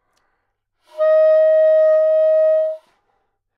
Part of the Good-sounds dataset of monophonic instrumental sounds.
instrument::sax_soprano
note::D#
octave::5
midi note::63
good-sounds-id::5859
Intentionally played as an example of bad-richness bad-timbre